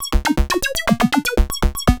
An Arp 2600 sequence